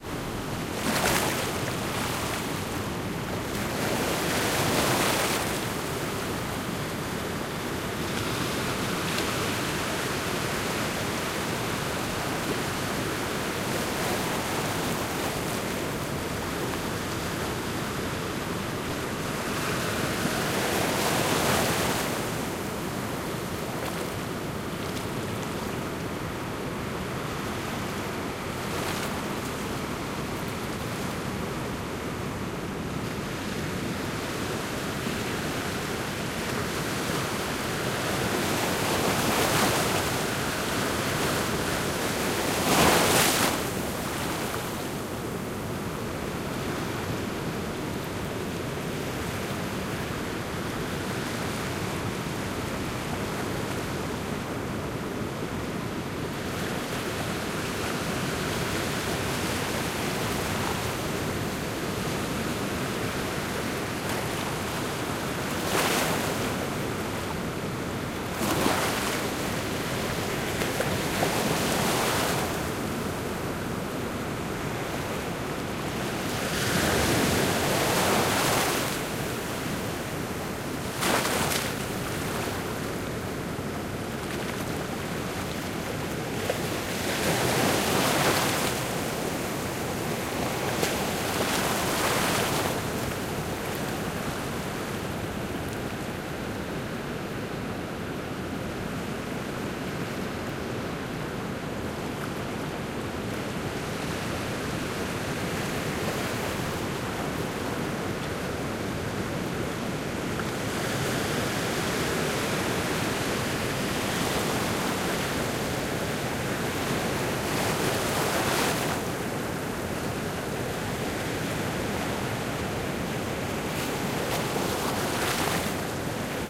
Ruby Beach on the Pacific Ocean, Olympic National Park, 20 August 2005, 8:10pm, standing on a rock 10ft into the water, 150yards from the woods, waves crashing on the rock